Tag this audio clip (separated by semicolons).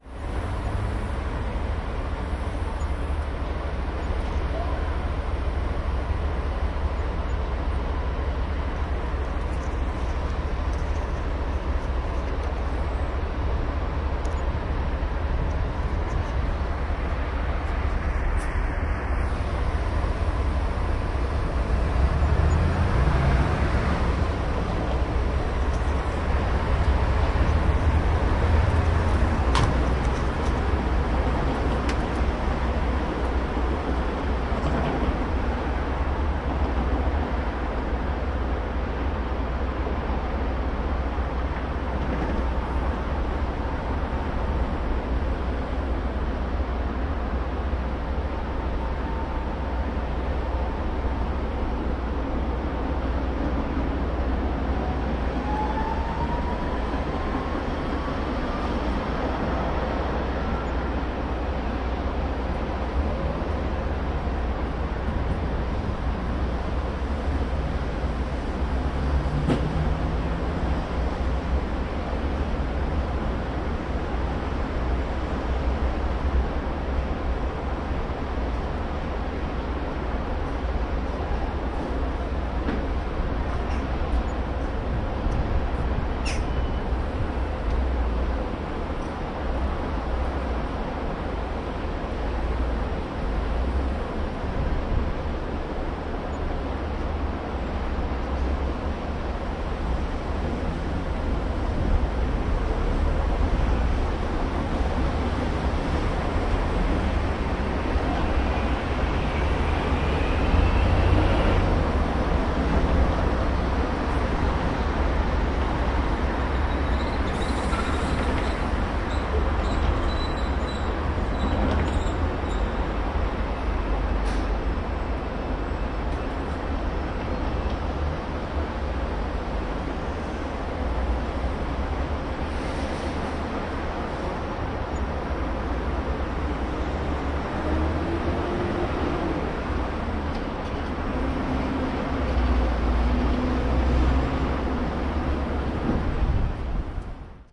praha; prague; intersection; ambience